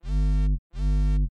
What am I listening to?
Artificial and clean cell vibrator sound. Made with Audacity.